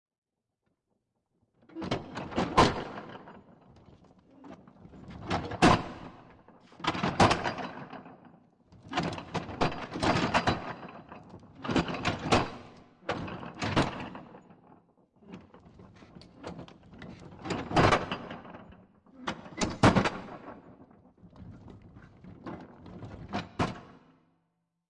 Metal Clanging
dang, oof